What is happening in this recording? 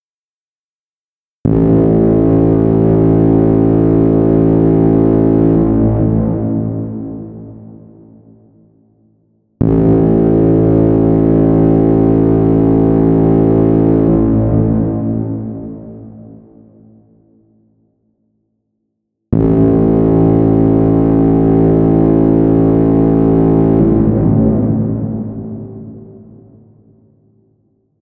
horn sound made with synth in Reason and processed with reverb